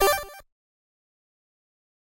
A retro video game menu select sound effect. Played when a player selects a menu option.